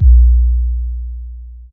808 boom kick sub bass style created on my Roland 808 Drum Machine and then edited in Sound Forge to soften the start and compress the output.